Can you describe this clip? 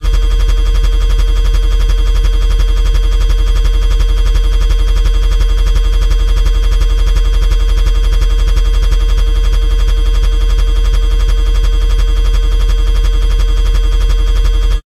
granular ambience 9 cyberthrash
ambient, artificial, free, granular, sample, sound, stereo, synthesis